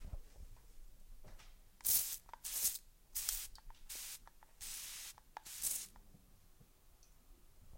Spraying an air freshener a few times